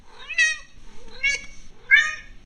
03 Cat Miauing
This is my cat miauing when she wants me to pet her :)
cats, miauing